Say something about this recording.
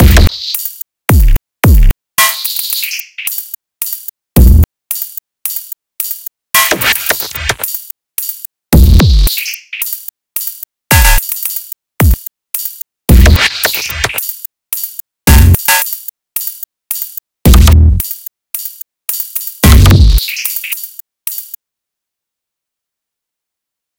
A loop of glitchy percussion, suitable for rage or leftfield trap.
drums, glitch, 110-bpm, noise, trap